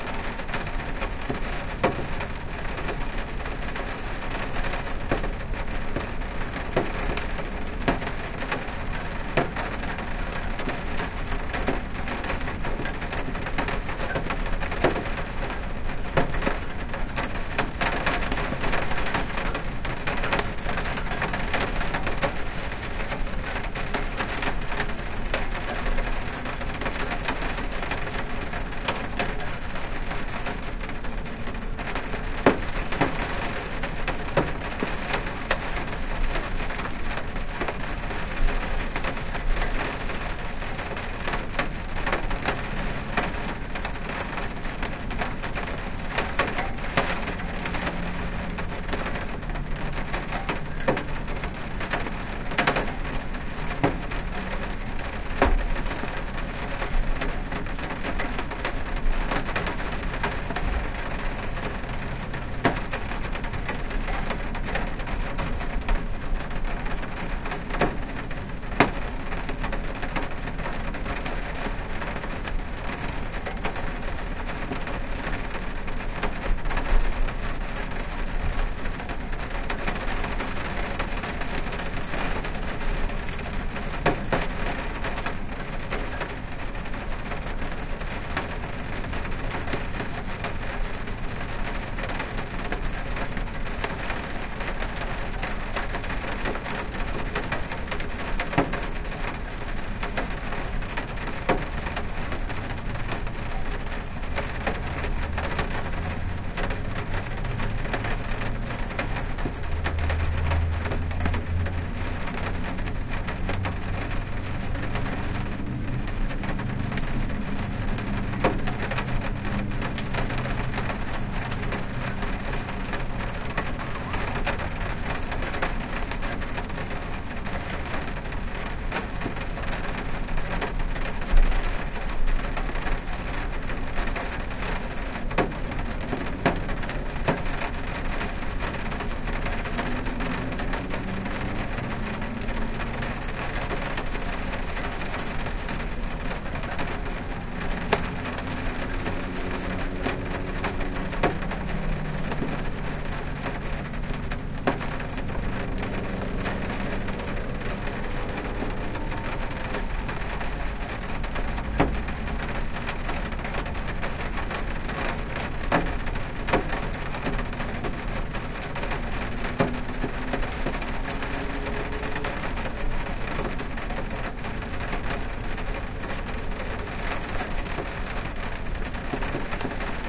There was medium rain on Long Island, NY. I put my r-09hr on my in-window air conditioner and pressed record. You hear the sound of rain hitting the window, and rain hitting the metal air conditioner. Edited to be a seamless loop
metal, seamless